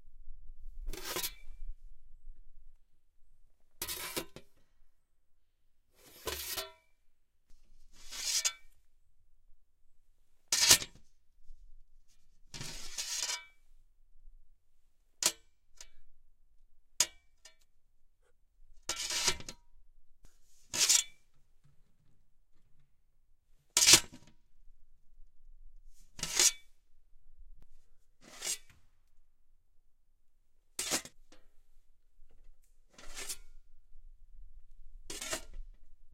metal sign on metal stand
putting a metal streetsign into its stand
stand, sign, metal